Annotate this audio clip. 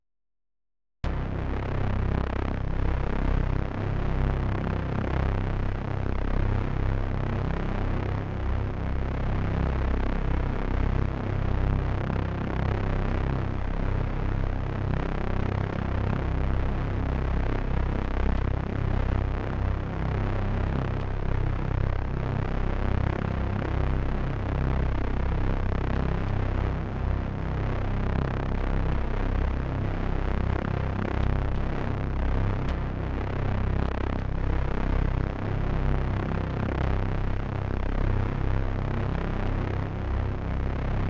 Heavy Distortion Bassy
Single-pitch heavy distortion with multi-layer. Mild modulation. Made for a emotionally intense moment in a horror film. Toward the middle you will hear a very quiet rhythmic element.
distortion, hard-hitting, heavy, scary, stab, surprise